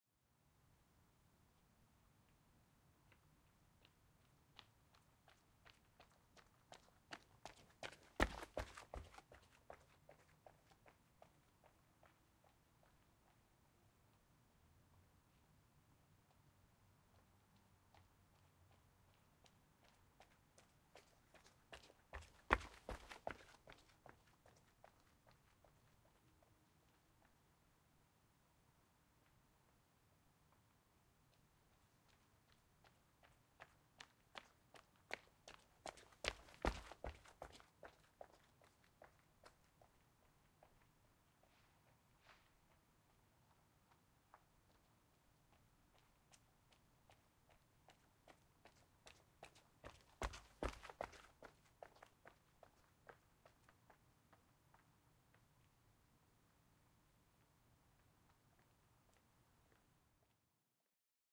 Jogging Past Footsteps Back and Forth in Tennis Shoes on Sidewalk
Recorded with my H1n on a quiet street, exactly what the title describes.